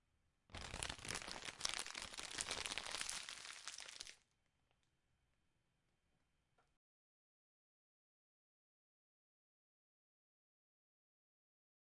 Bolsa siendo arrugada